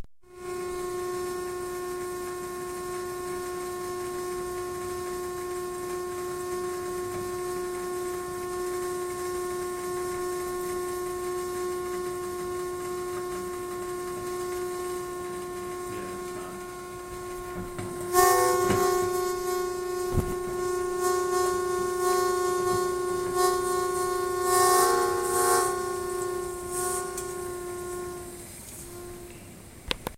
this is the drone of a whistling tea kettle.
teakettle, whistle, kettle, whistling